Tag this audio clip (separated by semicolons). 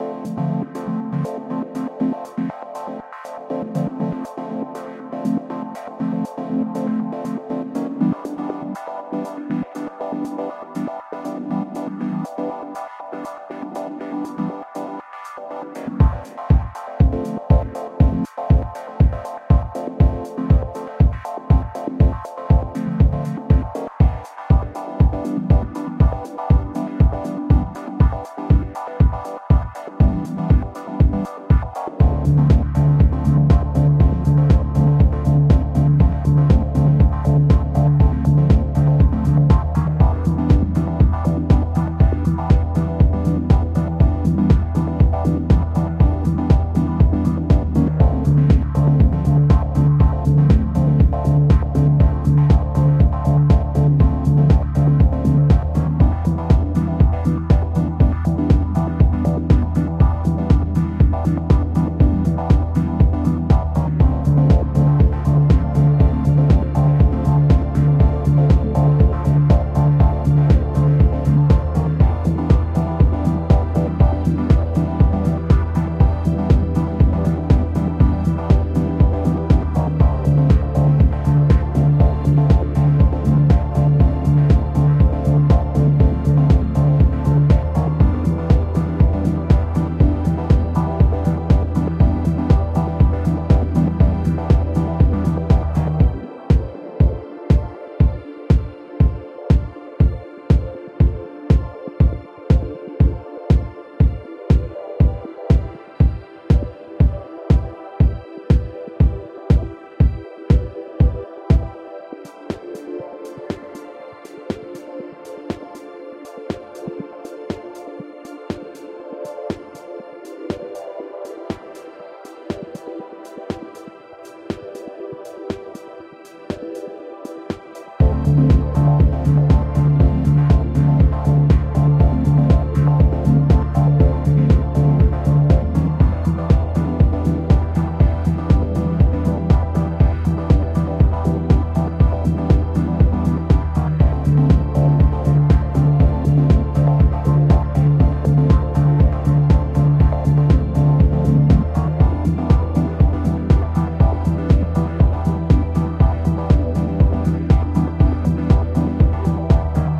background; electronic; game; game-music; melody; synth; techno; track; trance